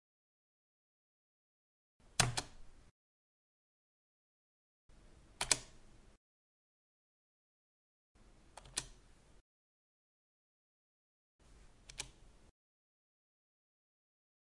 push the enter button on a classic computer keyboard